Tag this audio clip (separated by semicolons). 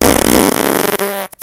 biological-warfare; fart; violent; flatulence